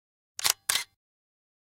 DSLR Shutter slow 002
camera, canon, dslr, mechanic, mirror, release, shutter